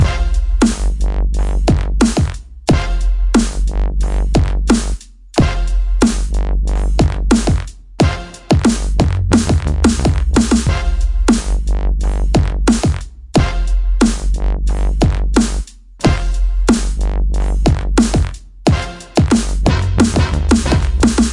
Heavy Loop
A new loop i created on my OP-1 from Teenage Engineering. Some massive bass and a little rythm section. 90BPM
It would be nice, if you could write me a message where do you use my sound, so i can brag about it!
You can also find me on:
Bass, Electric, Loop, Synth, Synthesizer